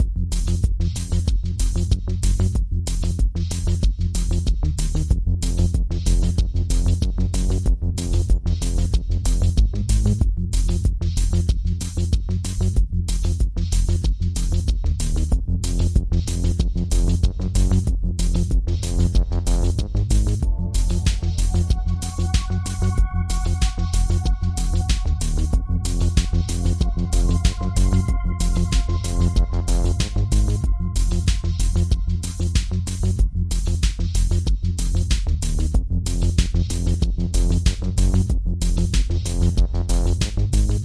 Arturia Minibrute + Korg M3 + Drums
Key of Fm